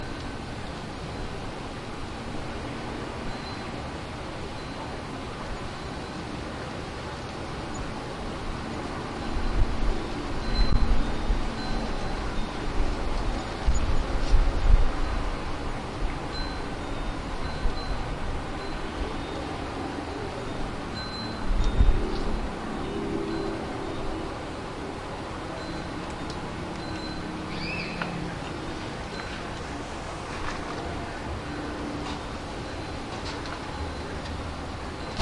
2nd floor balcony in the wind during another test of the homemade windscreen on the Olympus DS-40.
balcony, field-recording, wind